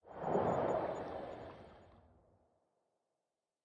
Fulfilling a user request for "underwater swishes and swooshes".
I initially planed to use some of my own recordings and even recorded some sounds for this purpose.
revealed much better sounds that could be processed and blended together to achive the desired effect. The mixing was done in
Ableton Live 8, using smoe of the built in effects (like EQ and reverb).
The sounds used are listed below.
Thanks to the original creators/recorders of the sounds I have used.
swish, movement, underwater, bubbles, submarine, swoosh